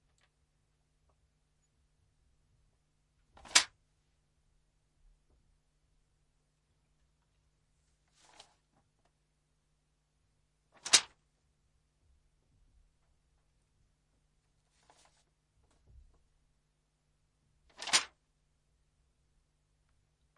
Booklet - Throwing down on wood 03 L Close R Distant
Throwing down a booklet made of some sheets of paper on wood. Recorded in studio. Unprocessed.
akg, dual, fall, sheet, rode, channel, sheets, studio, fostex, pov, close, booklet, distant, throw, falling, mono, perspective, throwing, wood, paper, unprocessed, foley